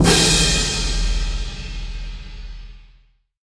hit of orchestral cymbals and bass drum
bass-drum, orchestral-cymbals, hit, kickdrum, bd, percussion, kick, drum, bassdrum